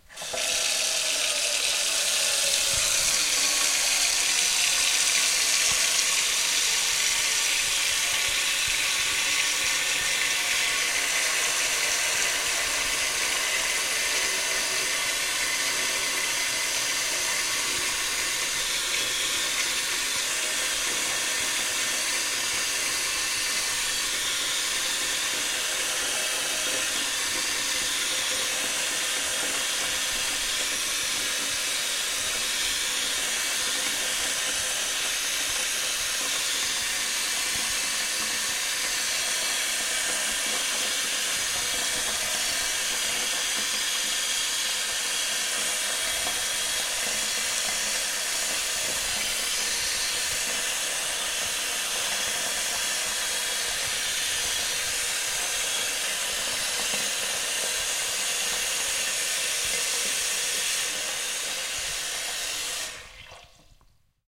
Kitchen-Sink-Fill-Up-Half-Way
This is a stereo recording of me filling up my kitchen sink with water (it is a dual, stainless steel sink). It was recorded with my Rockband USB Stereo Microphone. It was edited and perfected in Goldwave v5.55. Enjoy!
drain, gargle, hole, kitchen, noise, noisy, plug, plughole, sink, water